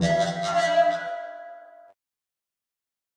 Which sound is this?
Plucked Remix 02
This is one in a series of remixes of AlienXXX's Contact Mic sample pack.
remix, processed, fx, contact, mic, rubberband